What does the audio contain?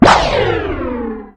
The sudden appearance of a person, kind of like apparating in Harry Potter. Pitch reduced really fast with gold wave. I reduced the pitch of a monster sound and this happened. The monster sound was made with my coughing and then the it's pitch was reduced a lot.